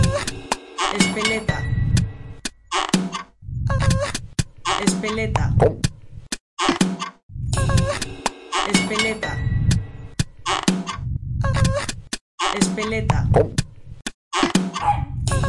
The sounds in this loop are not edited, only volume and/or length, so you hear the raw sounds. I cannot credit all the people who made the sounds because there are just to much sounds used. 124BPM enjoy ;)